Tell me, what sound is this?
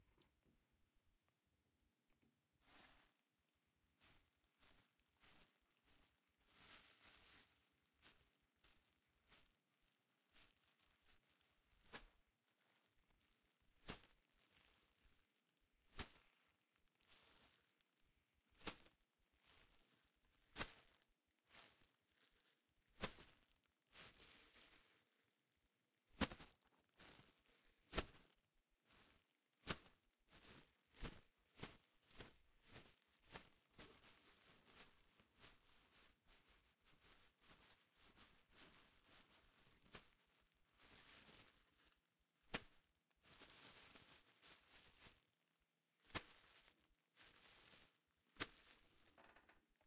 Plastic Bag Drops
A plastic bag rustling while being carried then dropped on the ground.
bag, dropping, plastic, rustling